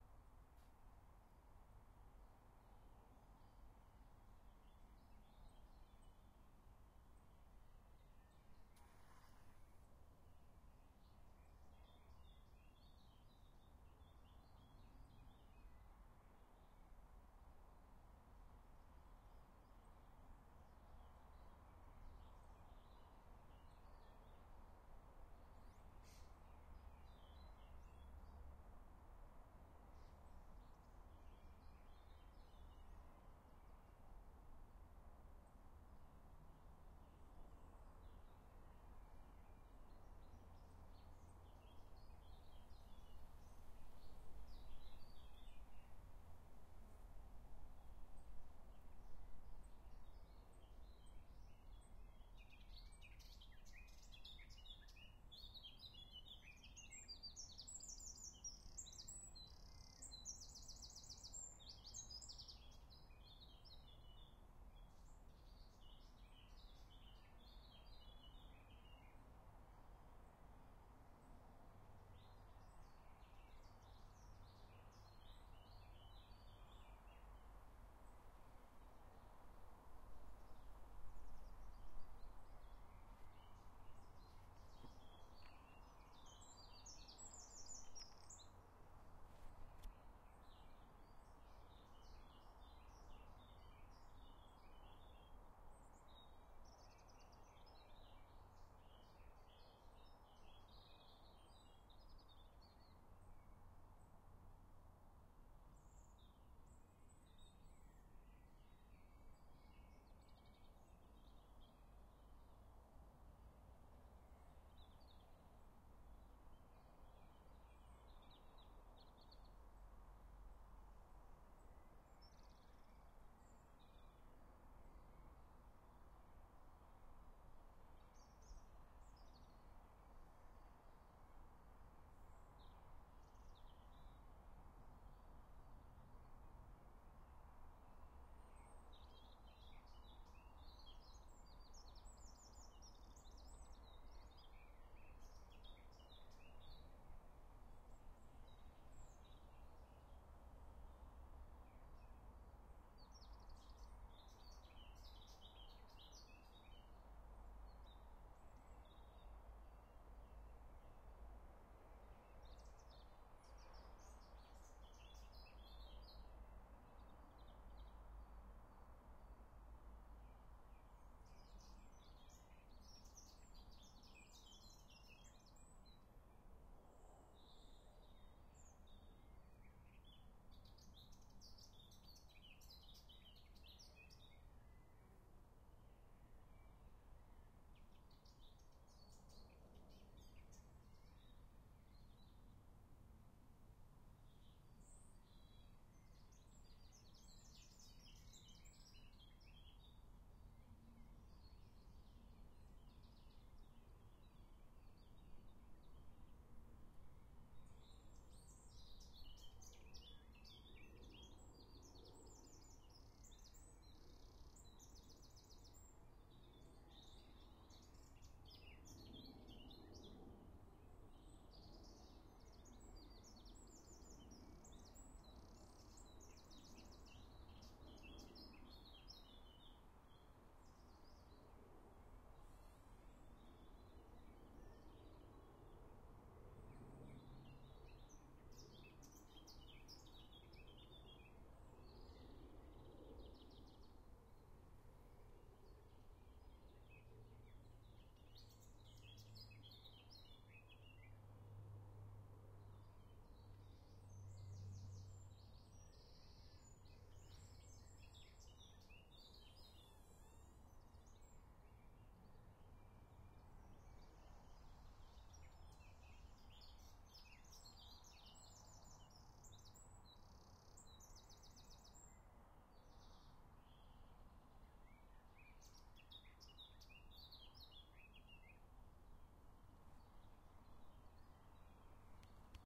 River Ambience during Summer
Apologies for cars throughout.
Summertime river ambience recorded in Ireland.
Airplane at 3:50
Recorded with Tascam DR-05